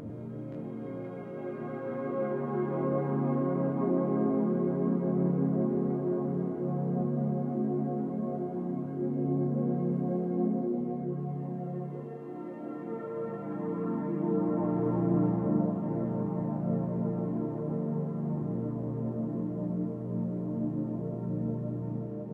cum zone pad (consolidated)
Korg M1 patch 'Reverse" with phaser chorus reverb
phaser, chorus, atmospheric, pad, flanger, modulation, ambient, korg-m1